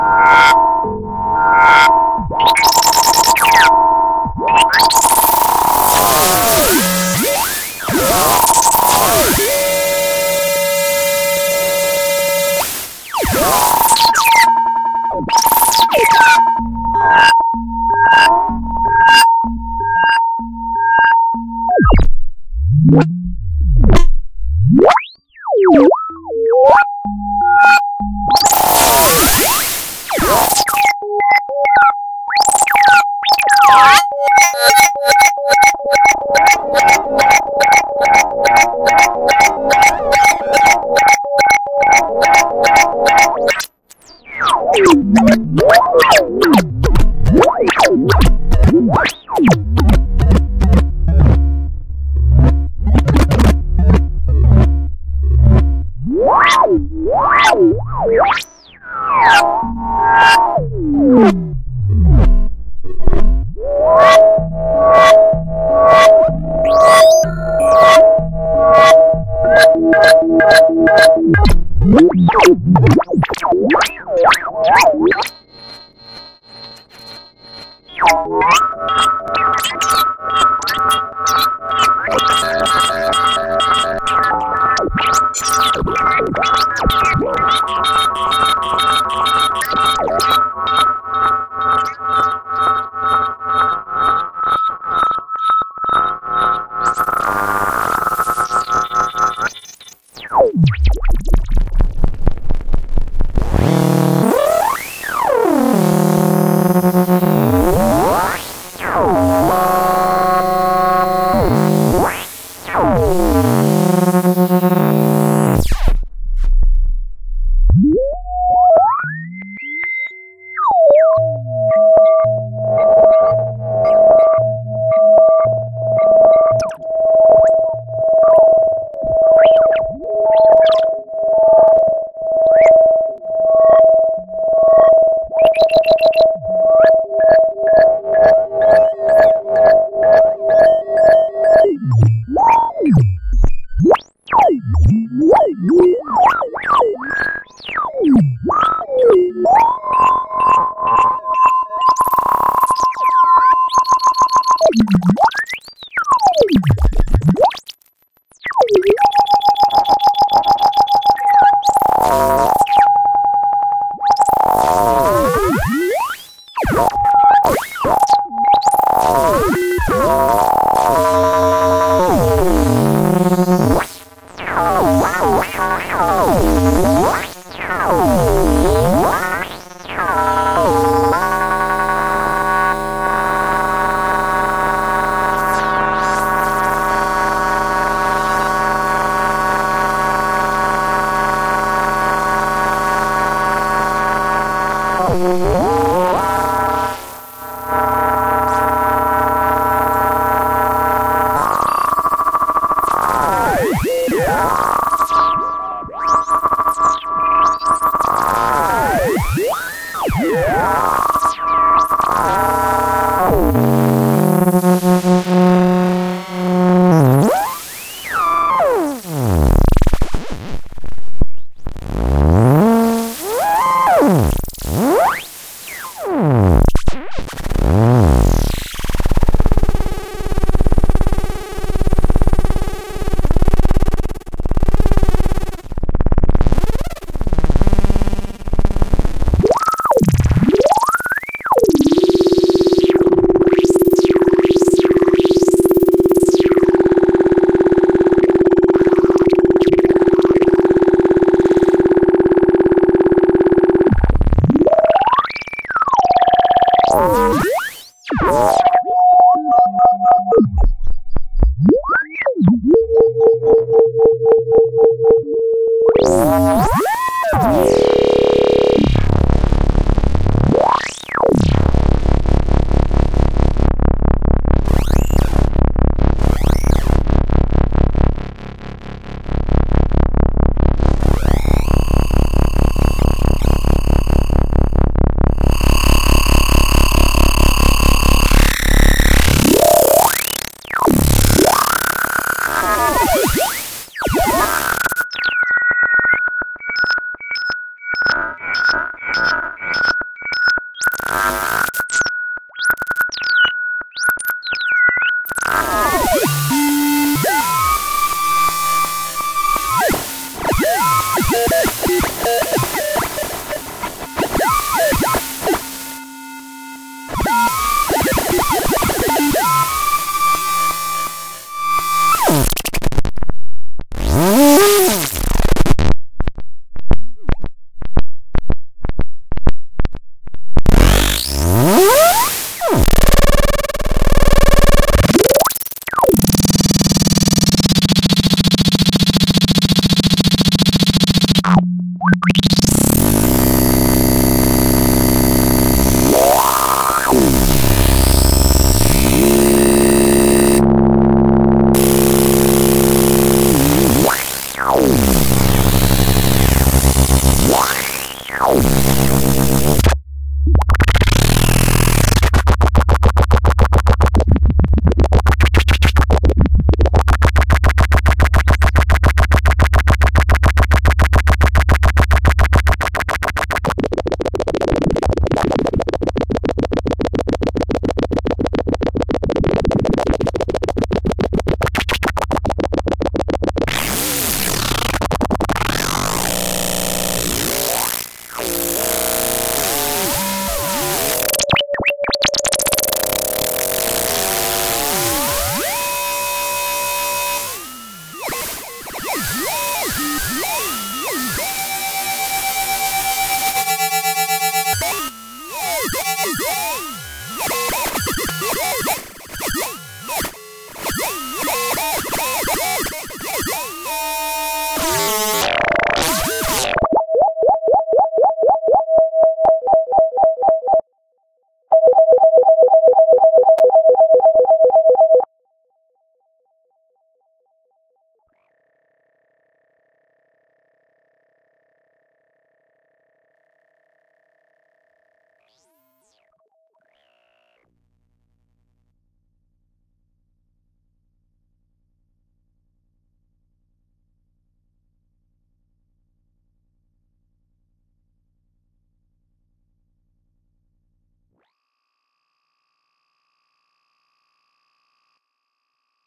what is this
Messing around with a simulation of a modular synth making weird electronic noises for a few minutes. Great for chopping up into ~250ms segments to use as sci-fi UI noises.